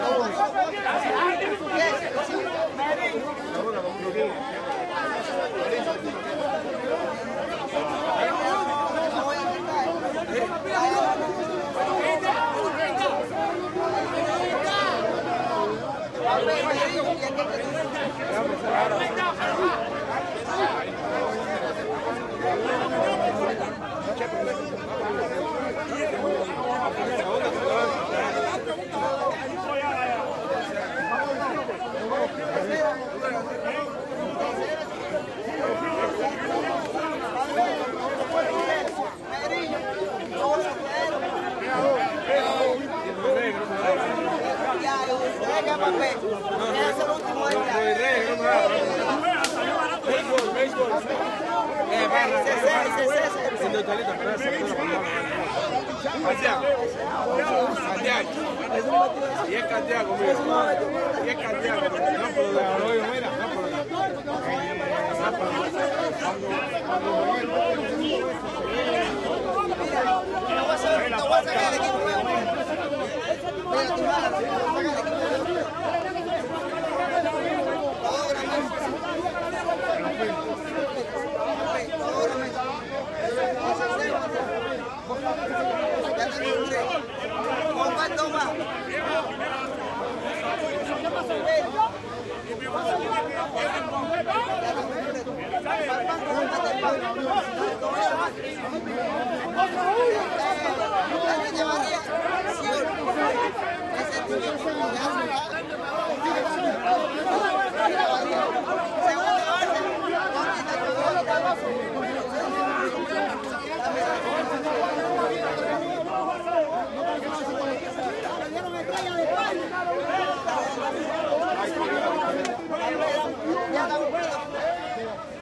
crowd ext medium loud walla Cuban men shouting arguing about basketball nearby Havana, Cuba 2008
basketball, Cubans, ext, loud, men